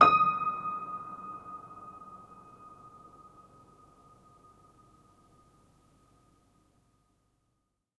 Recording of a Gerard-Adam piano, which hasn't been tuned in at least 50 years! The sustained sound is very nice though to use in layered compositions and especially when played for example partly or backwards.Also very nice to build your own detuned piano sampler. NOTICE that for example Gis means G-sharp also kwown as G#.
pedal, horror, sustain, string, piano, detuned, old